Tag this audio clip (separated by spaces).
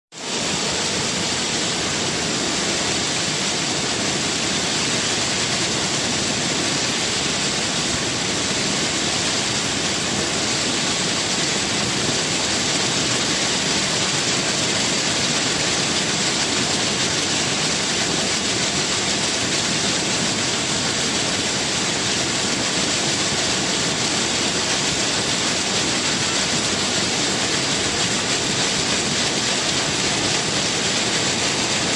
water falling soundscape waterfall rush field atmophere ambient recording nature